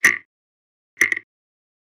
delphis FX CLOCK 2
FX BASIC SOUND FOR MAKING A CLOCK!
tick, chime, delphis, watch, ticking, fx, clock, basic